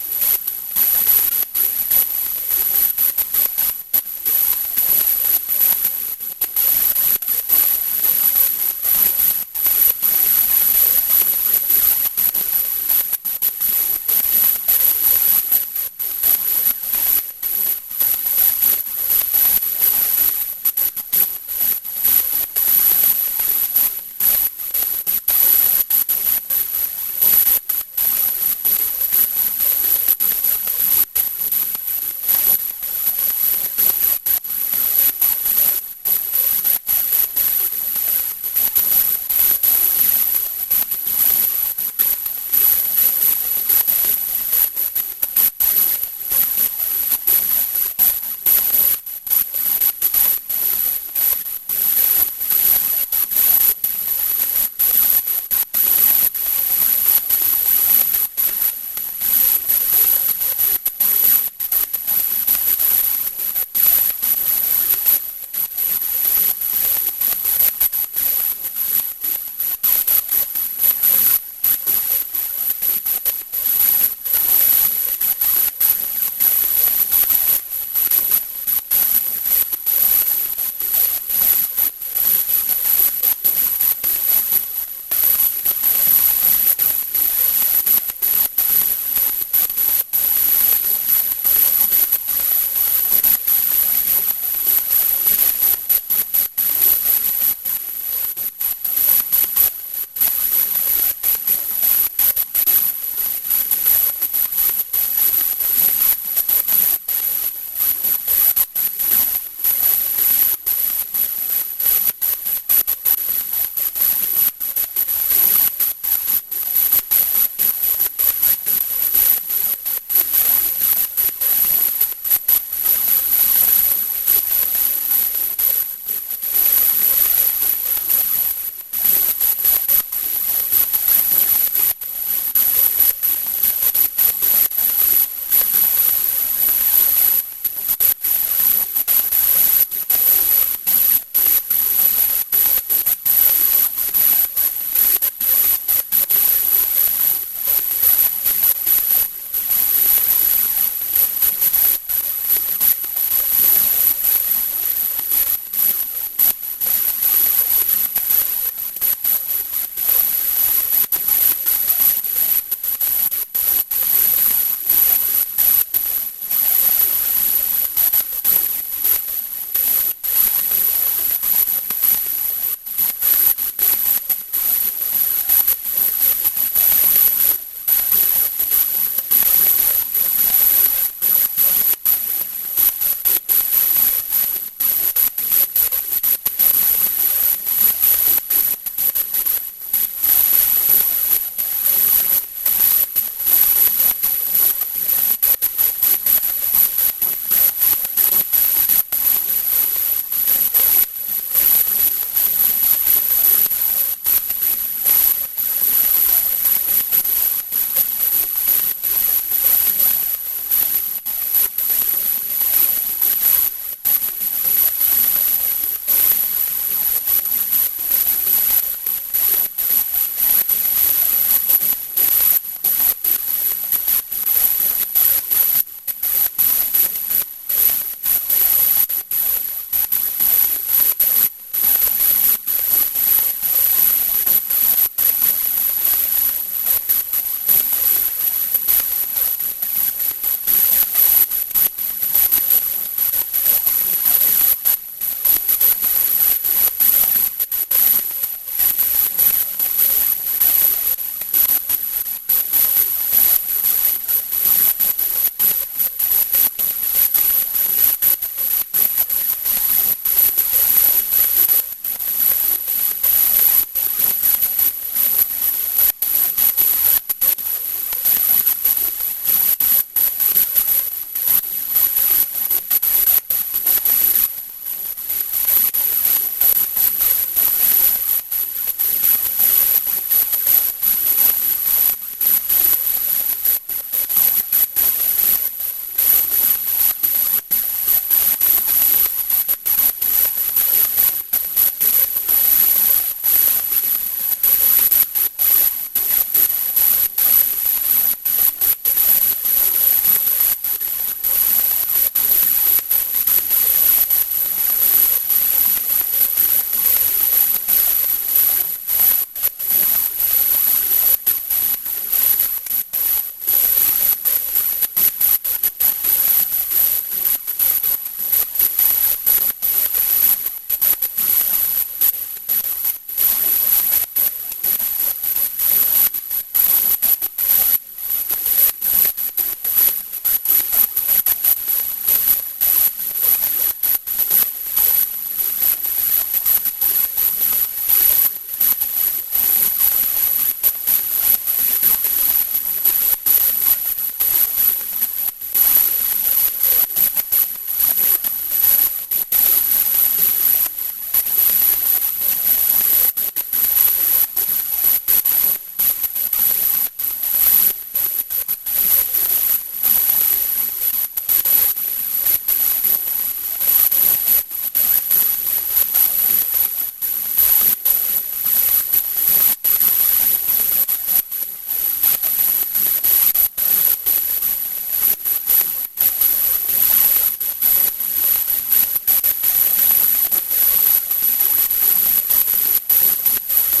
Russian FM NordLead 3 3
nordlead, 1, 3